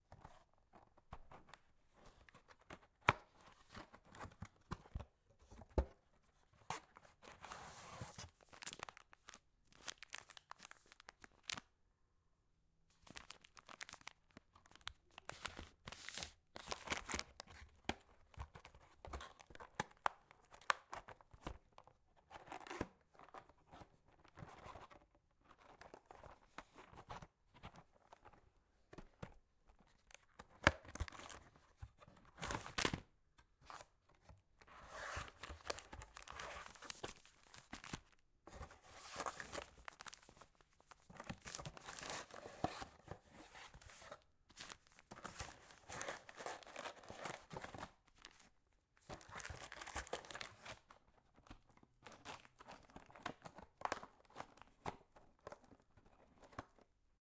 Taking snacks in a packet out of a box, putting them back in , recorded with a zoom H6